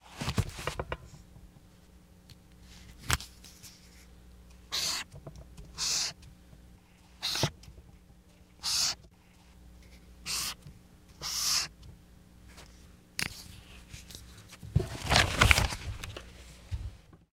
Drawing a red x on a piece of paper three times with an old marker. AKG condenser microphone M-Audio Delta AP
paper, writing, foley, drawing, marker